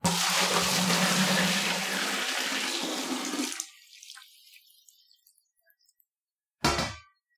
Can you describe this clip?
Pouring Water (Short)
A shorter version of water pouring into a bucket.
Bucket, Drink, Liquid, Pour, Pouring, Splash, Water